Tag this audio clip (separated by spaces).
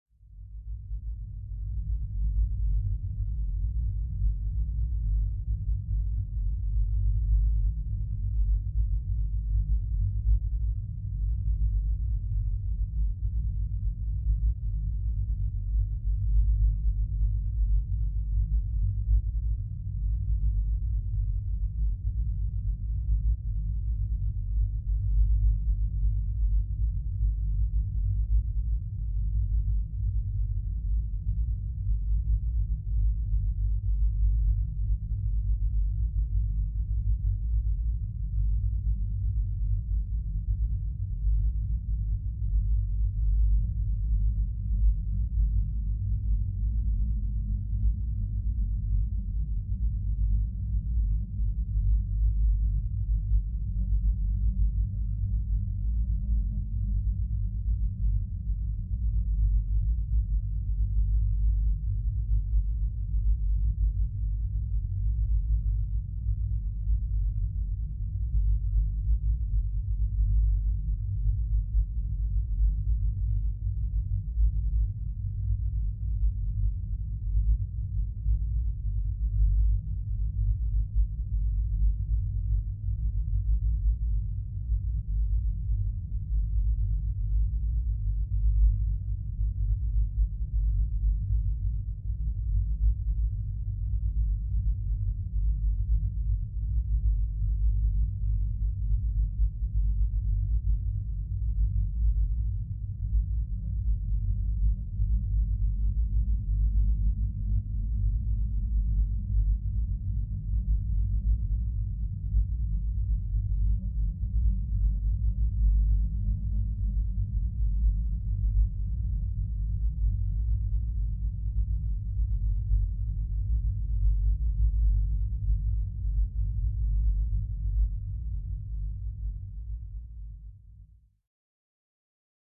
Ambient factory fan